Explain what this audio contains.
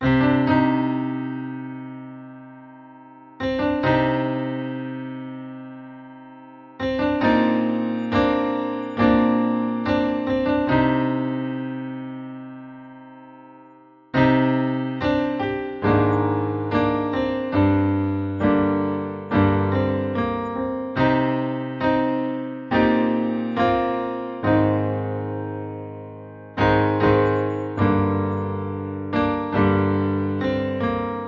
140bpm Piano Solo 11.Apr 11
For use at your leisure. I make most of them at 140 bpm so hopefully one day they make their way into dubstep.
Chop/splice/dice/herbs and spice them, best served piping hot, enjoy.
Fondest regards,
Recorded with Logic Pro 9 using the EXS24 sampler of the steinway piano (Logic Pro default) with a touch of reverb to thicken out the sound.
140, dub, improvisation, solo, steinway